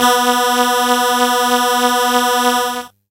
Trumpet C2 CHO
These are the "Instrument" sounding sounds from a broken keyboard. The
name of the file itself explains spot on what is expected.
lofi, 16, homekeyboard, hifi, sample, from, 44